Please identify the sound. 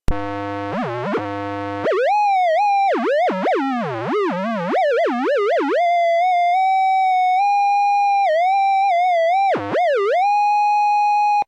Colorino light probe, Lifecam hd3000 light moving around
Moving the Colorino around on the front of my Lifecam HD3000 as the little working light is on. It's a tiny light, so tricky to find the center of it. Like many LED's that aren't running directly off AC power this produces a clear tone, no modulation except for that caused by my moving the probe around it.
The Colorino Talking Color Identifier and Light Probe produces a tone when you hold down the light probe button. It's a pocket sized 2-in-1 unit, which is a Color Identifier/Light Detector for the blind and colorblind. The stronger the light source, the higher the pitch. The more light it receives, the higher the pitch. So you can vary the pitch by moving and turning it.
Recorded from line-in on my desktop using Goldwave. Low-pass filter was applied to lock out the 16khz sampling frequency.
playing, LED, modulation, LED-light, light-probe, blind, modulated-light, frequency-modulation, color-blind, Assistive-technology, accessibility, webcam, electronic, noise, color-detector, light-to-sound, experimental, tone, fm